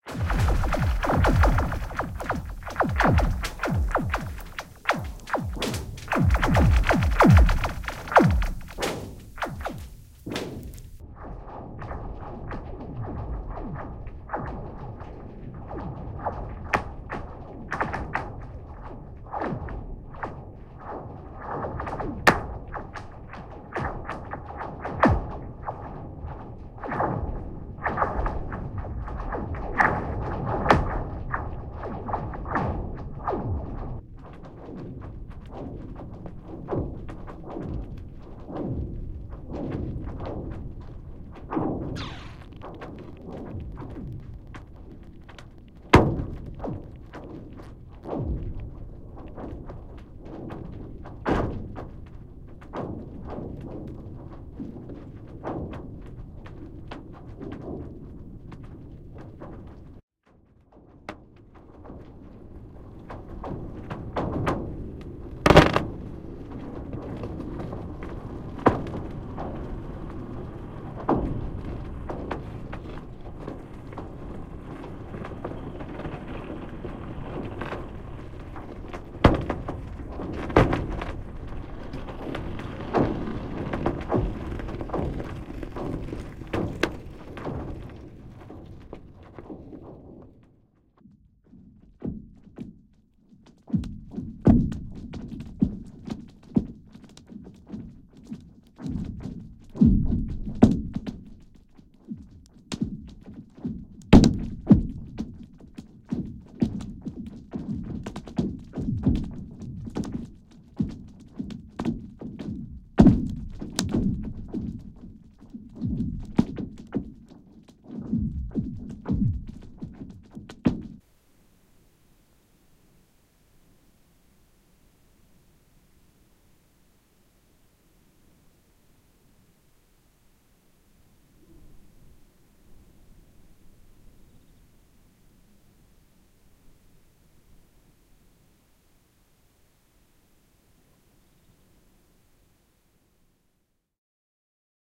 G. Cordaro Braies reel
Created and formatted for use in the Make Noise Morphagene, 24th January 2016 I was at Braies Lake to record the sound of ice lake
Cordaro-Giuseppe, Ice, Lake, Makenoise, Morphagene, Trentino-AltoAdige